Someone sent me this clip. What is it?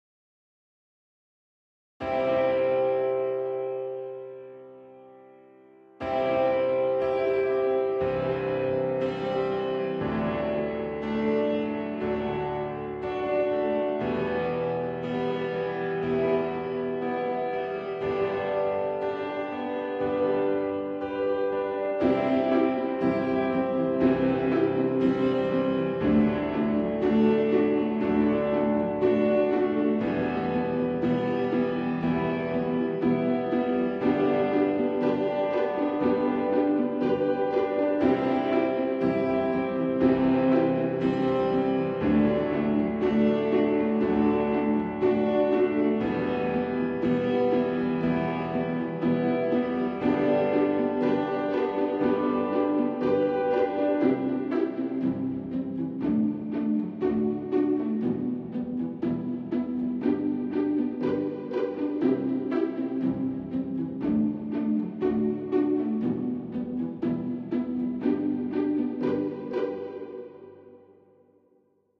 Light Playful sounds3
Wrote/recorded a playful tune using Ableton Live 9.
Pizzicato and Staccato strings done with Native Instrument's Kontact5 player.
Piano and reverb using Ableton's stock plugins
hope this helps and is useful for your next project.
cheers,